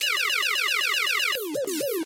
Free The Lasers!
Pew pew pew pew pew, we're free!
8bit, game, gun, Laser, pew, repeating, retro